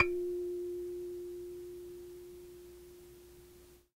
Lo-fi tape samples at your disposal.